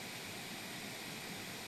White noise ambience.